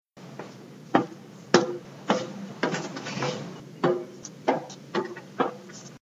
Footsteps on stairs 01
Walking up and down metal stairs.